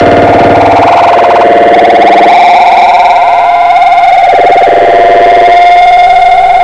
A short electronically produced acid-trip type of sound file
that is weird and definitely present.
funny, loud, repitition